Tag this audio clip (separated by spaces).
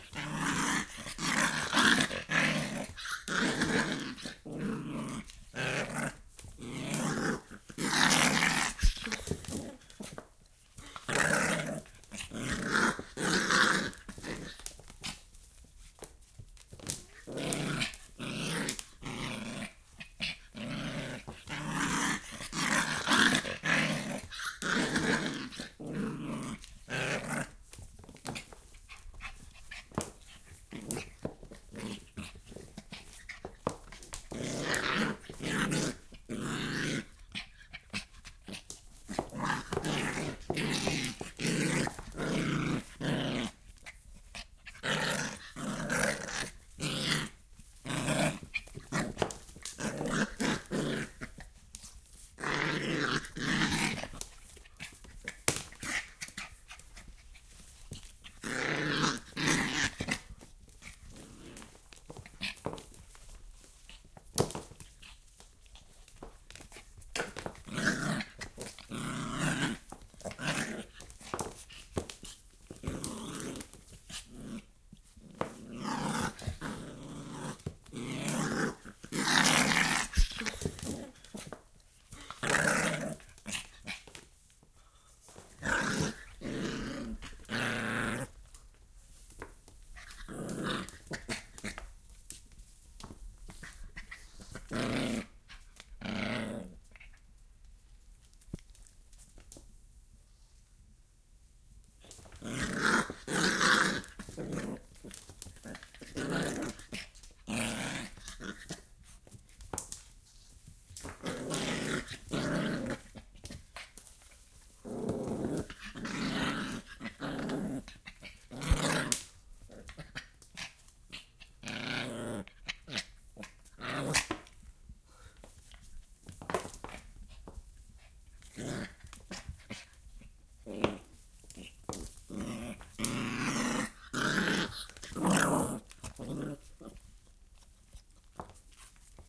dog growling playing rope small